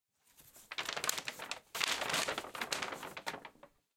14.1Opening paper bag
Sound of shoping in litle store. ( crackles....)
Czech, Shoping, Panska, Pansk, CZ